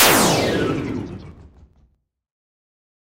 Magic Burst2
Made in Audacity using a balloon popping, a tone generator, and partially my voice, added delay, and added partial reverb.
magic, sparkle, spell, fantasy